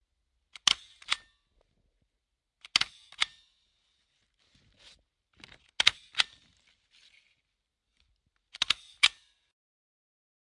sounds of a stapler
music152, stapler